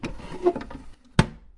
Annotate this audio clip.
compartment closing
The lid of an ice-maker closing.
Recorded with a Zoom H1 Handy Recorder.